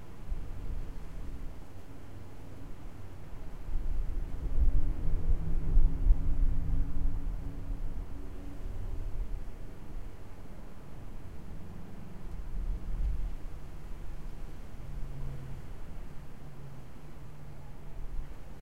I stayed home from work to record a hurricane and all I got was this.... recorded with B1 thru Mic200 and clip on mic through various extension chords and adapters across my living room...